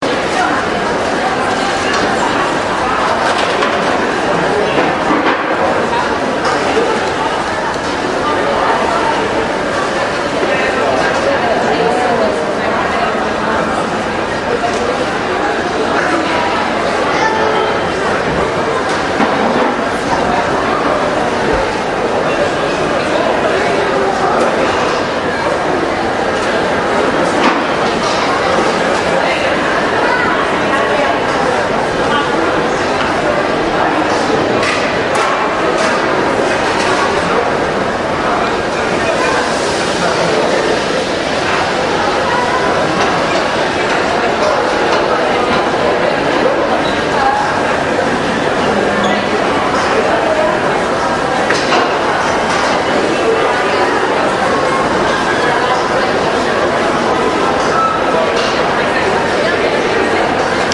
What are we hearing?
About a minute of raw background audio taken from the Friary shopping mall in Guildford, England.
An example of how you might credit is by putting this in the description/credits: